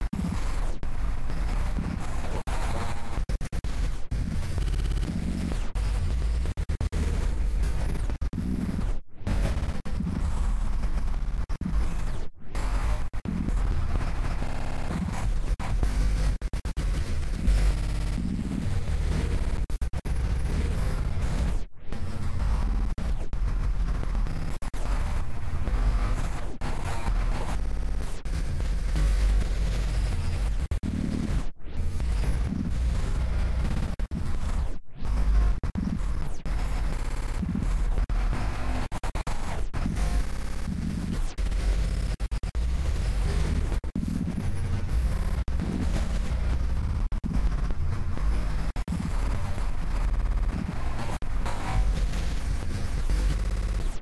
tb field haight
One in of a set of ambient noises created with the Tweakbench Field VST plugin and the Illformed Glitch VST plugin. Loopable and suitable for background treatments.
Ambient, Field, Glitch, Illformed, Noise